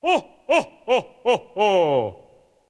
santa - ho ho ho #1
jolly old fella from the North Pole!